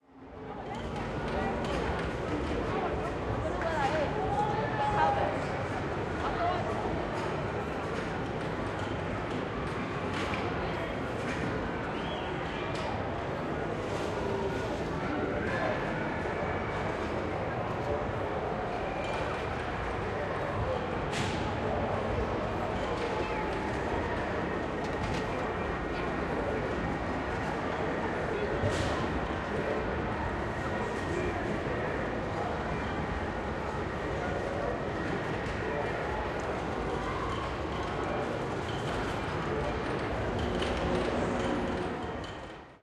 Amusement arcade-01
Recorded this on my ipod touch 3G with Blue Mikey microphone using a FiRe app. I recorded it on Brighton Pier in the amusement arcade.
It's always interesting to find out.
Amusement, arcade, machines, slot